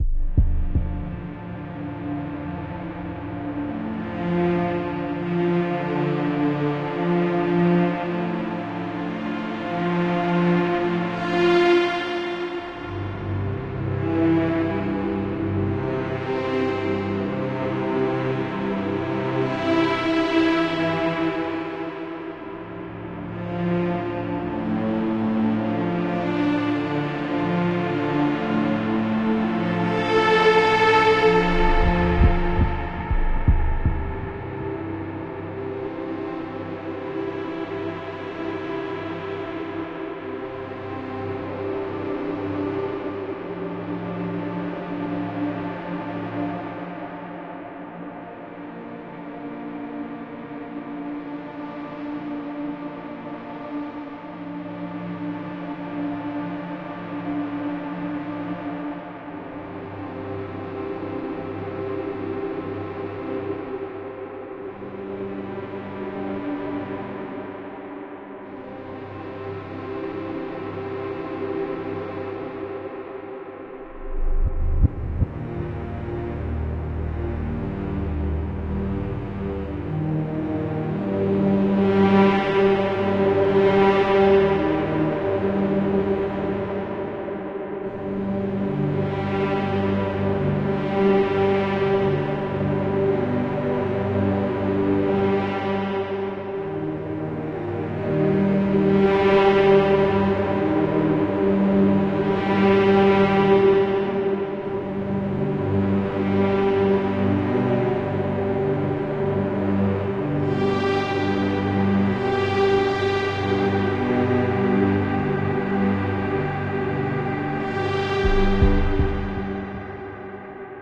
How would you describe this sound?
saying good bye before battle music for war game in vr
did this on keyboard hope you like it :)